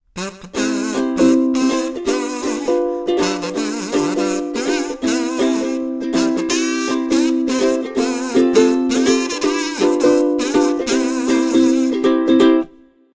The happy birthday song played on ukulele and kazoo.